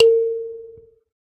SanzAnais 70 A#3 forte b
a sanza (or kalimba) multisampled